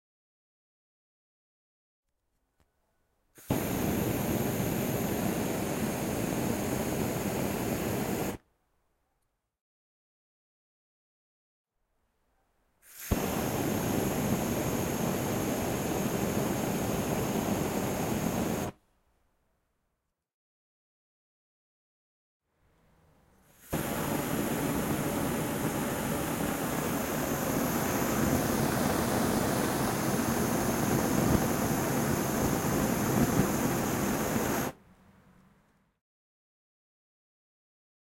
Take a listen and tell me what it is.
Sound of the flame gun.